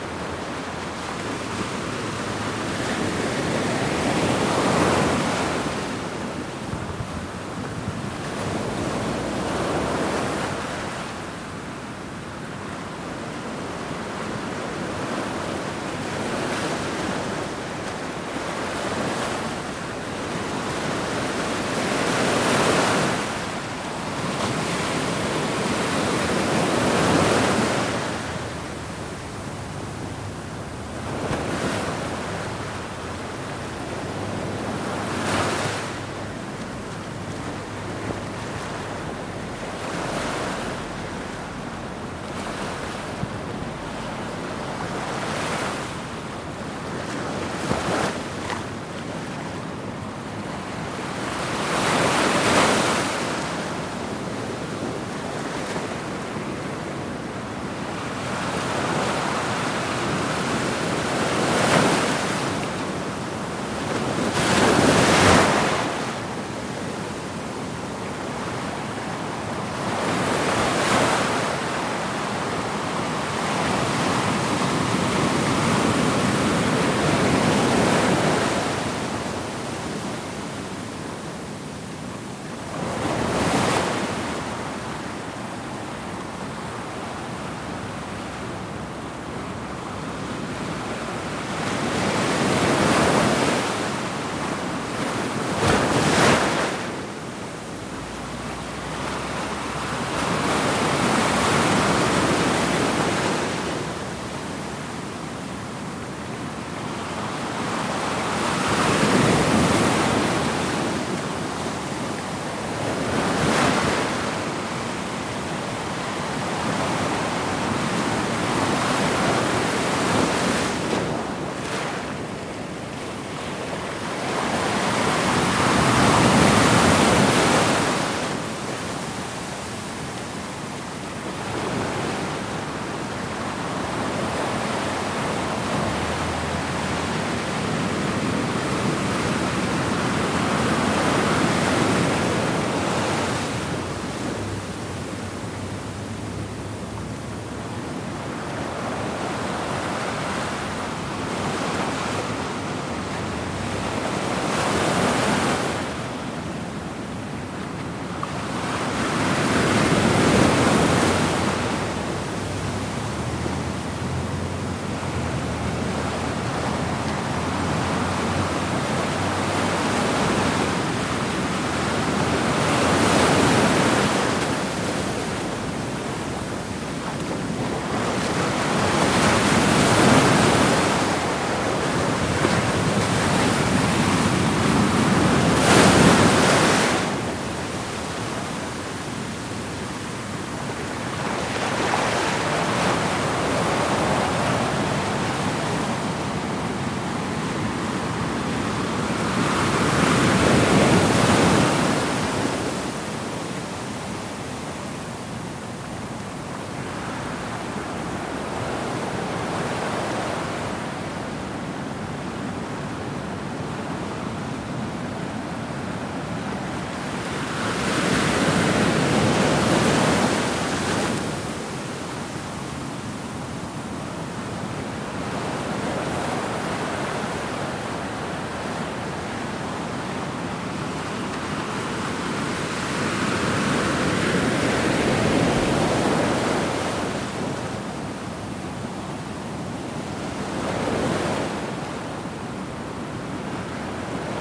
Gentle Waves on the beach at Haleiwa Alii Beach State Park. Protected area so relatively small waves
H5 with foam windscreen surrounded by a dead cat.
Field, Hawaii, Water, Waves